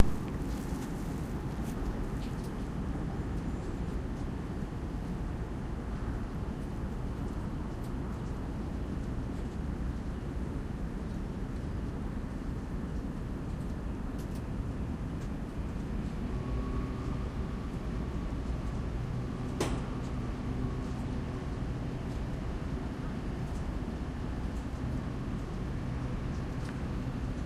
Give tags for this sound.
field-recording pier water

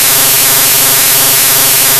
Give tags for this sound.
16-bit loop fm mono hifi sample synth two-second electronic

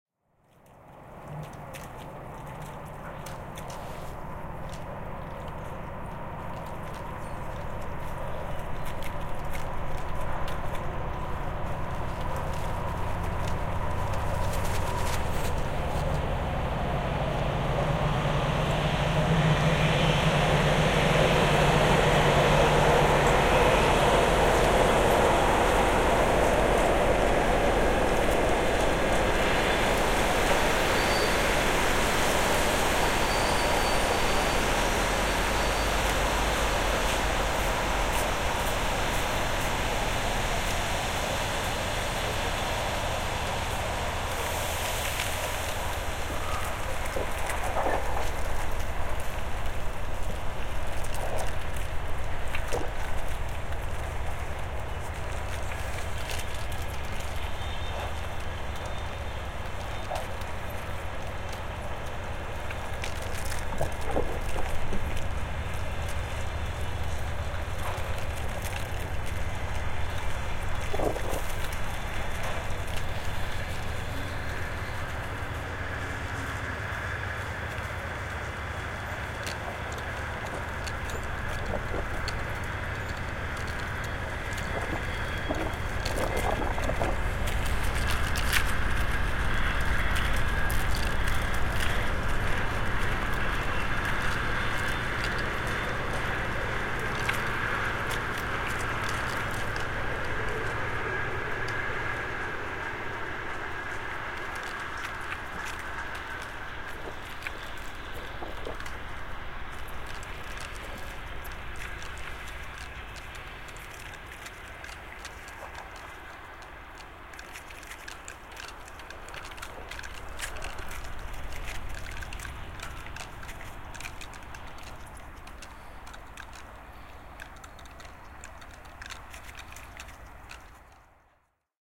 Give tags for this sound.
winter field-recording paper Moscow ambience wind train binaural city

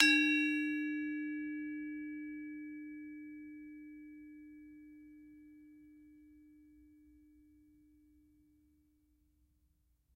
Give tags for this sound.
gamelan bali

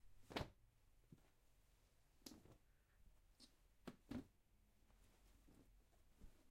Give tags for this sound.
Folding OWI Clothes Cloth

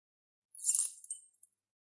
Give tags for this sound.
rattle agaxly metallic metal keys clang

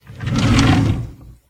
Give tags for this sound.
Open Wooden Drawer Wood Empty

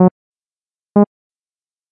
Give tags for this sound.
asteroids beep asteroid ship rocket boop a

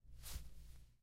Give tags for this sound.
clothes pocket sci-fi

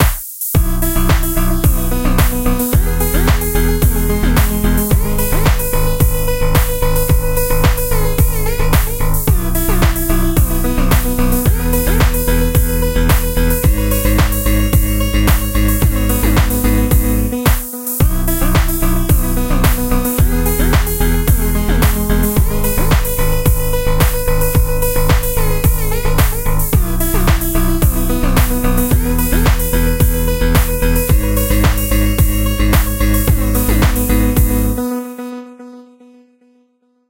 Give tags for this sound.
110bpm Db loop minor music

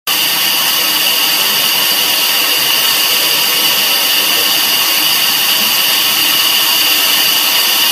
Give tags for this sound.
pipes steam hissing heating pressure air hiss pipe

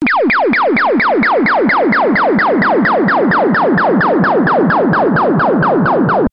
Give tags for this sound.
fast game gun shooting

